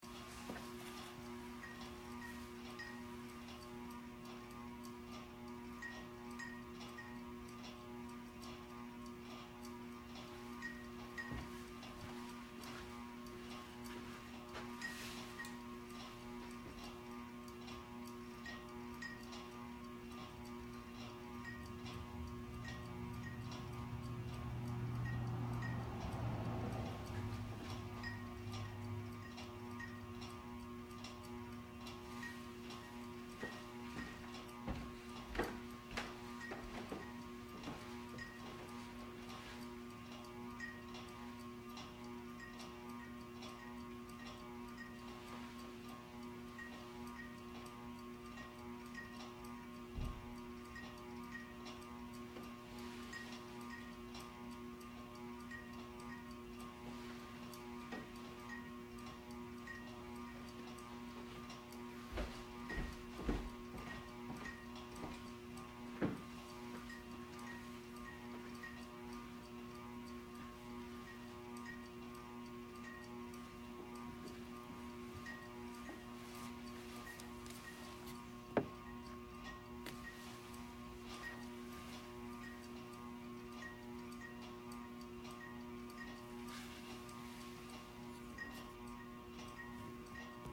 Ceiling fans field-recording